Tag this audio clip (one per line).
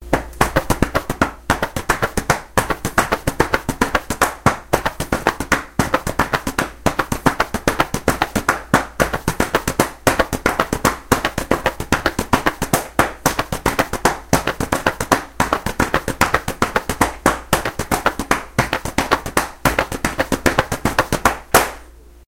Slap
Rhythm
Hand